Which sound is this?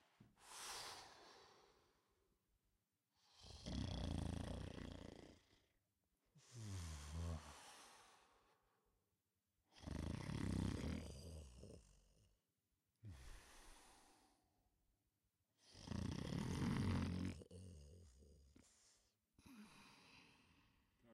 Snoring man.
Recorded for some short movies.
short, snore